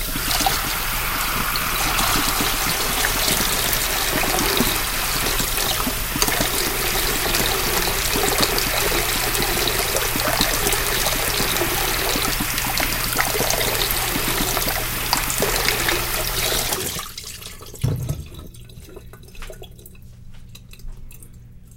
My kitchen sink (B1 through preamp) and a fountain down town (recorded with my phone) mixed and processed.
contest, water